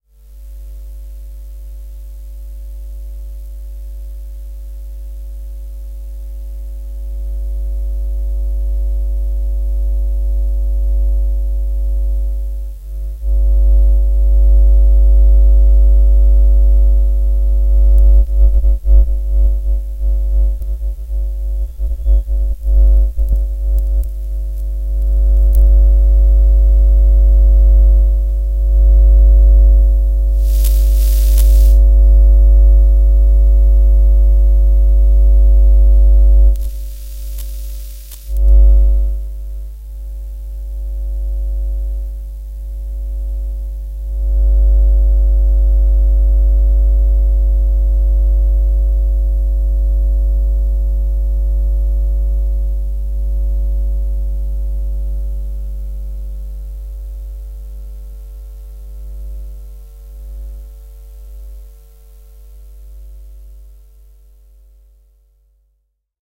This recording was made using a telephone pick-up coil. A pick-up coil is sensitive to electromagnetic field radiation (EMF) and transduces the field into an audible signal.
The recording captures the emf radiation generated by a power station situated on Raw Dykes road in Leicester.